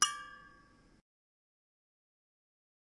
Megabottle - 25 - Audio - Audio 25
Various hits of a stainless steel drinking bottle half filled with water, some clumsier than others.
bottle, ting